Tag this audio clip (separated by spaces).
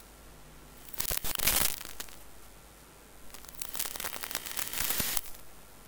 electronic electricity hiss cable future Sparks lo-fi machine glitch digital electric buzz fault